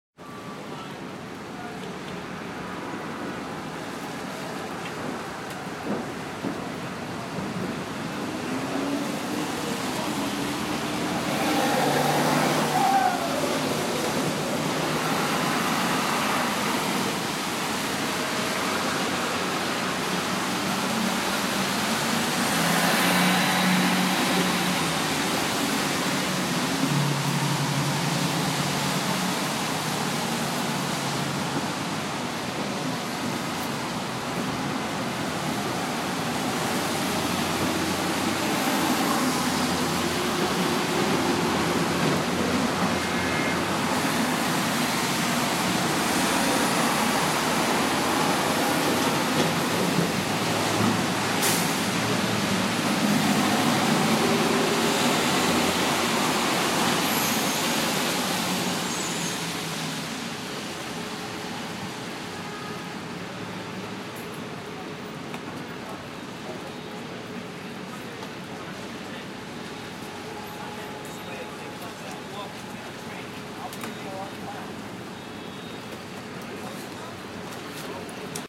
rainy city traffic
Rainy day recording of a busy street in Manhattan -- November, morning rush hour. Created using an iPhone App.